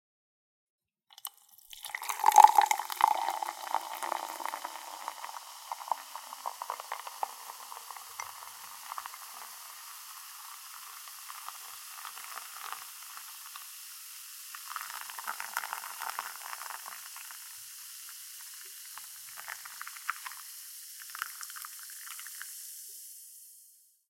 Pouring a fizzy drink or been into glass.
beer, liquid, pouring, soda